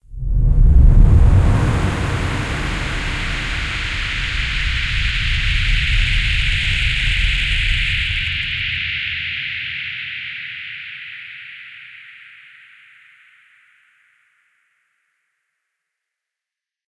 SCI-FI ROCKET LAUNCH 01
An electronic simulation of a rocket or spaceship launch.
noise, launch, spaceship, rocket, sci-fi